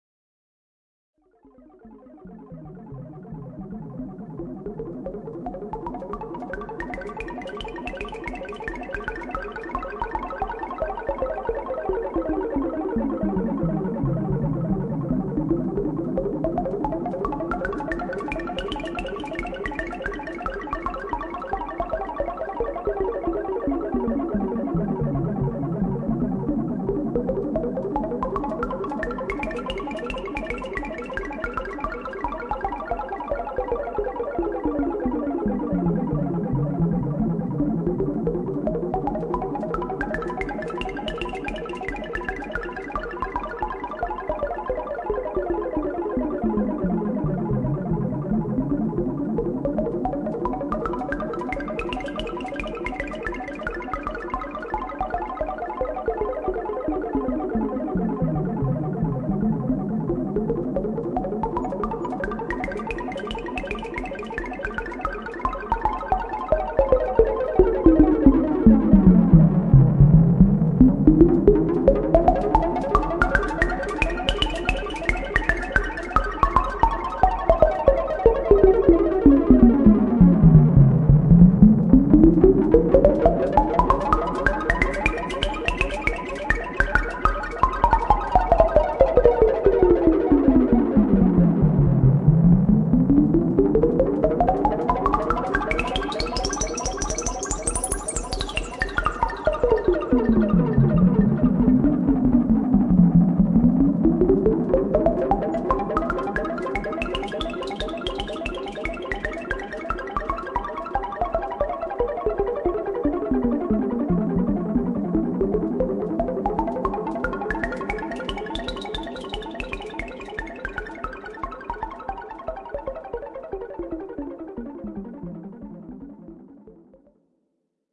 Liquid electronic stream, 08.01.2014
Recorded on the 8th of January 2014 using Cubase 6.5.
Used a Korg Monotribe groovebox, Doepfer A-100 modular synthesizer and a TC Electronic delay with high feedback.
I'm not 100% sure about the Korg Monotribe, but I believe I hear the hihats or noise from it.
It's always nice to hear what projects you use these pieces for.
You can also check out my pond5 profile. Perhaps you find something you like there.